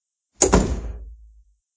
Door closed 1
the sound of closing door
door, door-closed